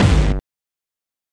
A distorted hardcore kick